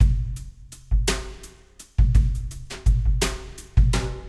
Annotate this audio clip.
Inner Fire
6/8 brush drumloop
84bpm, 6-8, brush, drumloop